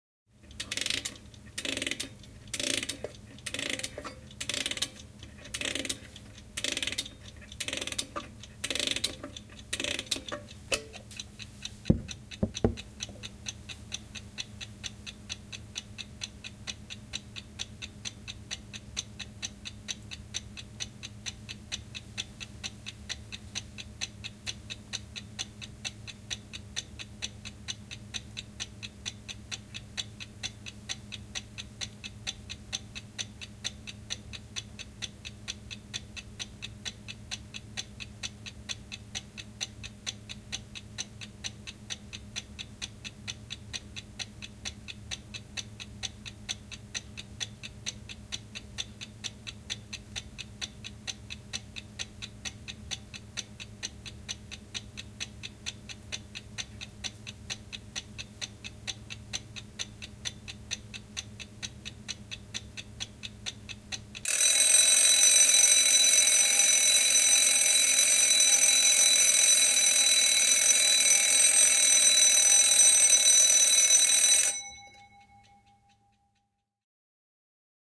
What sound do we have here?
Herätyskello, pirisevä / Alarm clock, big mechanical, wind up, ticking, ringing alarm (Peter)
Mekaaninen iso herätyskello kuparikelloilla vedetään, kello käy, tikitys, soi piristen, lähiääni. (Peter).
Paikka/Place: Suomi / Finland / Nummela
Aika/Date: 01.01.1992
Wind-up, Yle, Ringing, Tick, Mekaaninen, Yleisradio, Ring, Ticking, Field-recording, Soida, Mechanical, Veto, Soitto, Finnish-Broadcasting-Company, Clock, Alarm-clock, Alarm, Tehosteet, Suomi, Tikitys, Finland, Soundfx, Kello